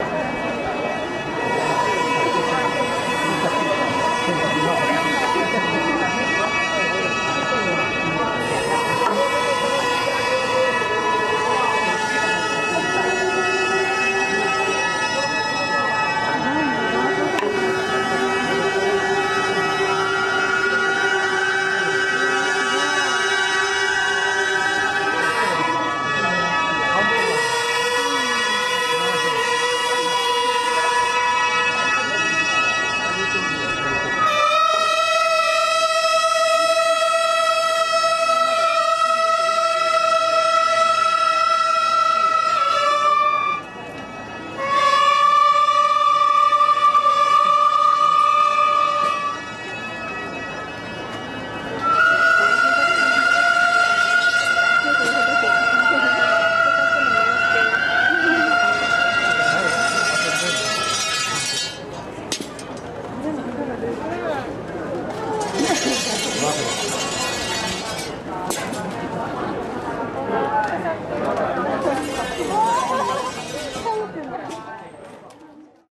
A recording made during the Tennjin festival in Osaka Japan. Flutes being played during the procession. There are 3 kinds of flutes that you can hear as the procession passes by.